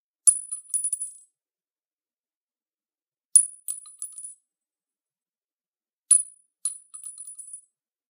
BLLTShel 30CAL BULLET DROP MP
Field recording of a .30 caliber bullet being dropped. This sound was recorded at On Target in Kalamazoo, MI.
The sample itself is much cleaner.
30caliber, gun, m1garand, grand, garand, firearm, rifle, bullet, casing, drop, caliber, 30, ping, m1